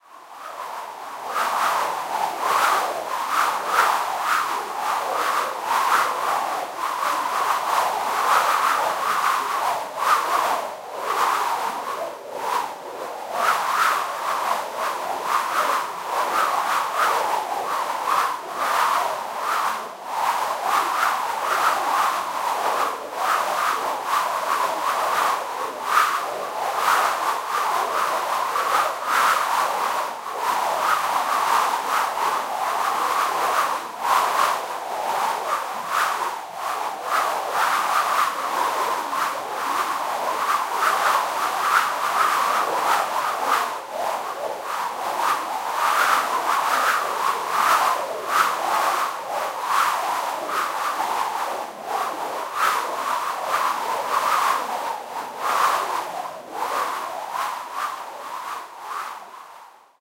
This sample is part of the "Space Drone 3" sample pack. 1minute of pure ambient space drone. Squalls.
ambient, reaktor